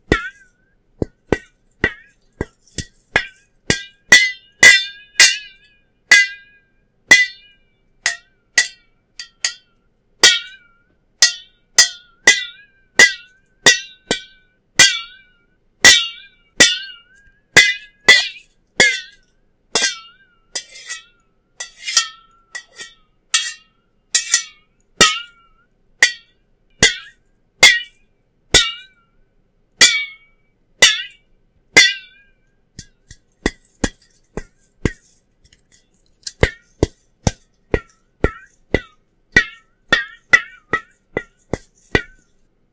me hitting a metal compressed air can. it makes some nice pitch shifting reverberations when tapped. this was recorded at my desk
compressed, spray, warping, hit, impact, metallic, can, foley, metal